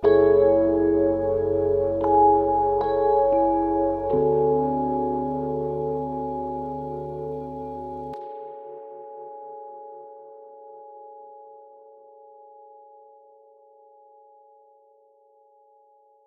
Ambient118BPM
rhodes, ambient